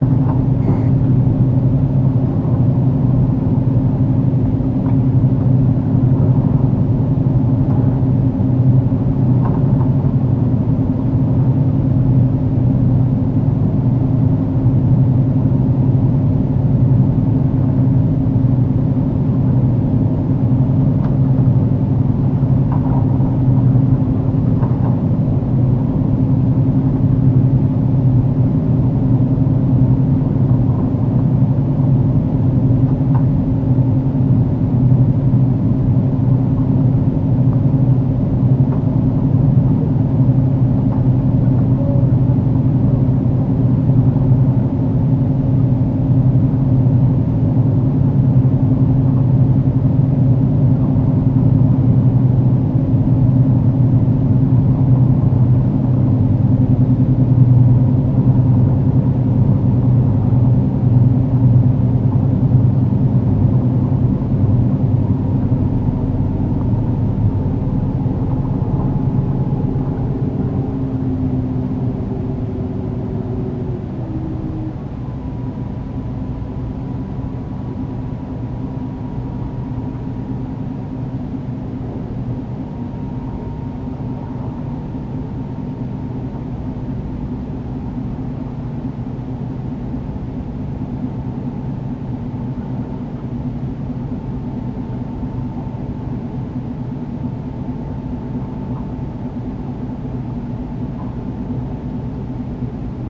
Large ship engine running recorded in cabin
Large ship 40 metres long boat with engines running. M/V blue Horizon, operating in the Red Sea. The engines shut down about 1 min in leaving the sound of the power generator and air conditioning. Recorded with a Contour+2 Camera.
aircon, boat, field-recording, hum, marine, mechanical, nautical, sea, ship, throb